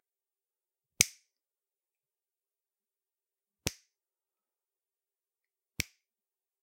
Finger Snap
Finger-snap, Fingers-snapping, Snap, Snapping